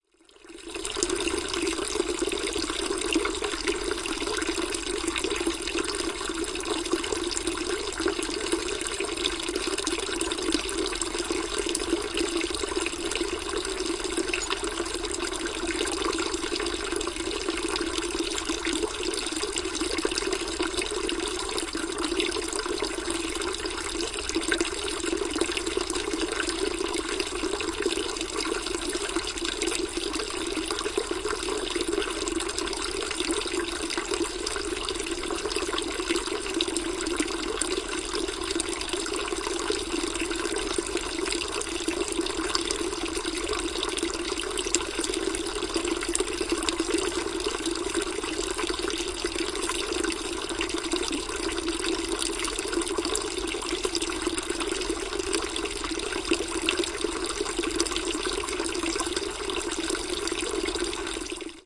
fountain water from small mountain